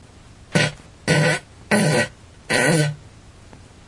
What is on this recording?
fart poot gas flatulence flatulation explosion noise weird
explosion, fart, flatulation, flatulence, gas, noise, poot, weird